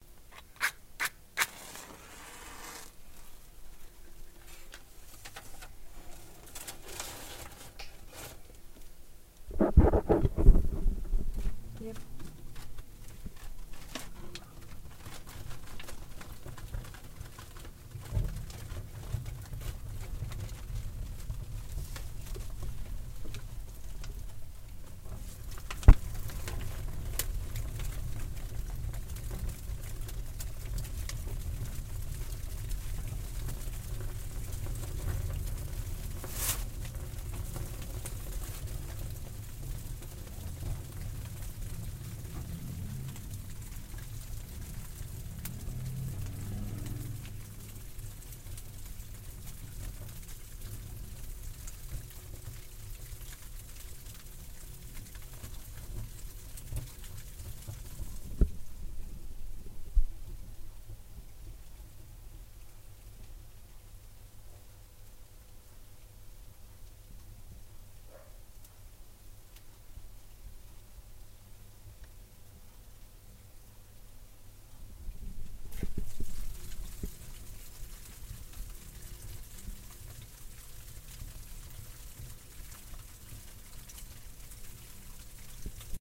Make Fire
Burn, Fire